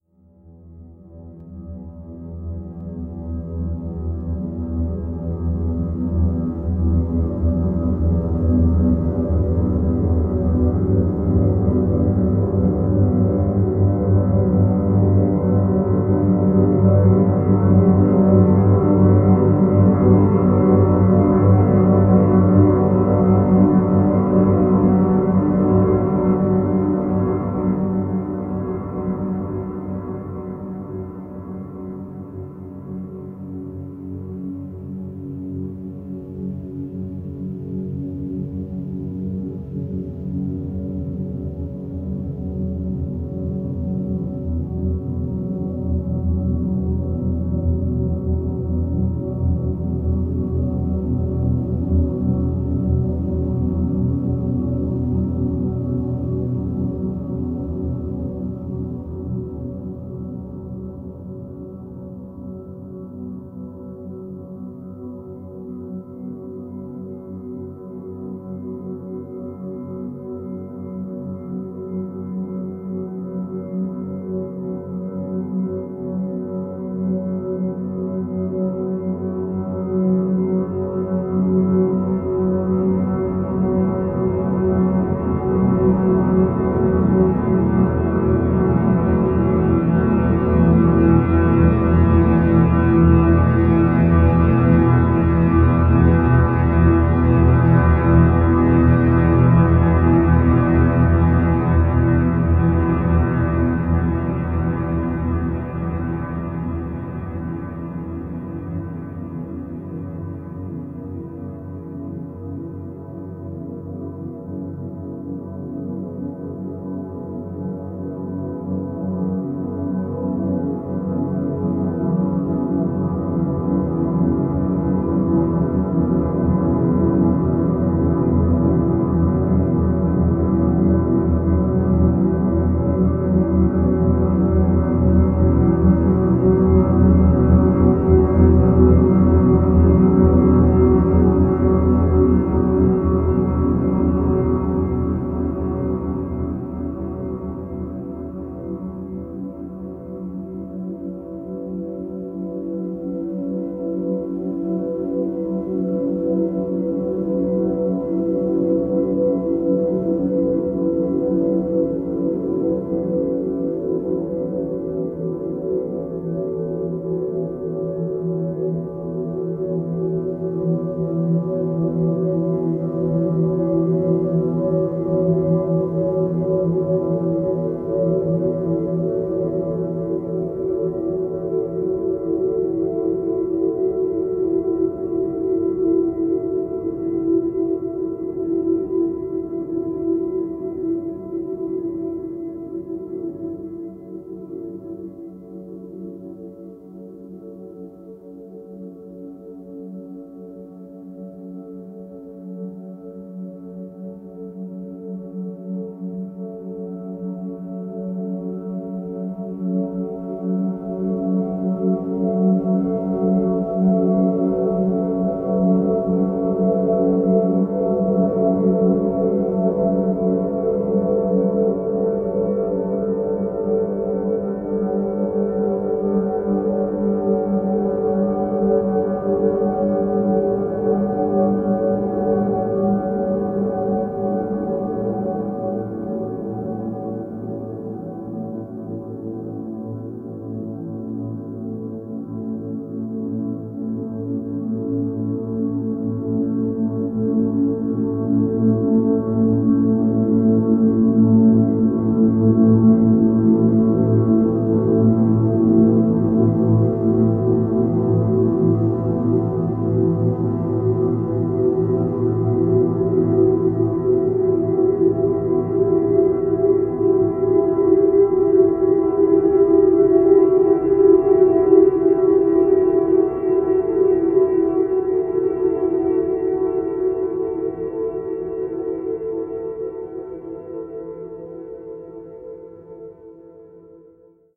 Mellow drone that began life as a piano piece
Ambient, Experimental, Noise